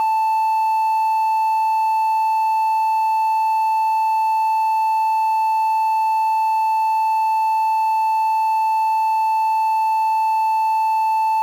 Doepfer A-110-1 VCO Triangle - A5
Sample of the Doepfer A-110-1 triangle output.
Captured using a RME Babyface and Cubase.
oscillator, wave, analog, triangle-wave, synthesizer, triangle, analogue, modular, basic-waveform, Eurorack, A-100, electronic, raw, VCO, A-110-1, multi-sample, sample, triangular, waveform